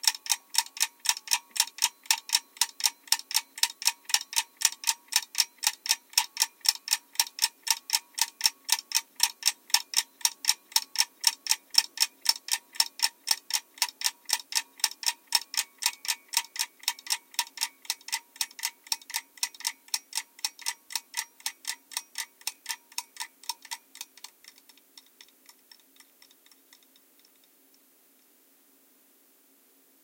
20090405.clock.stereo.dry
clock ticking. Sennheiser MKH60 + MKH30 into Shure FP24 preamp, Edirol R09 recorder